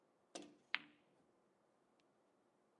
Pool Ball Hit 3
Sound of the cue ball hitting the rest of the billiard balls.